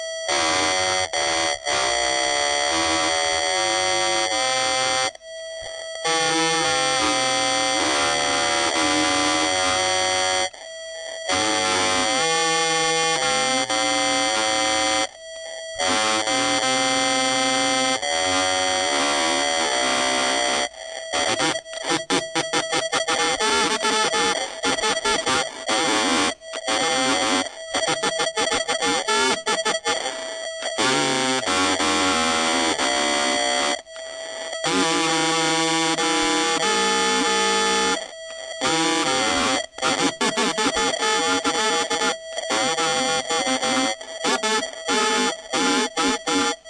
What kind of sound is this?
This was one of many ways to sending radio messages during WW2. You can hear what he is singing, but it's impossible to understand speech without a correcting downloading. The technique was not very effective. Smart guys fixed the interpretation over a night.